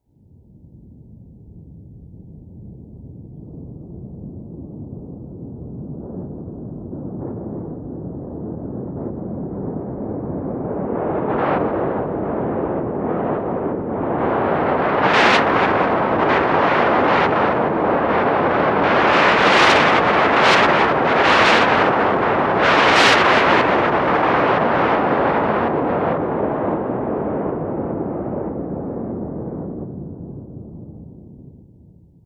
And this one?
ARP Odyssey wind
ARP Odyssey rushing wind sound, created with noise generator and filter resonance.
analog, ARP, noise, Odyssey, synth, synthesizer, wind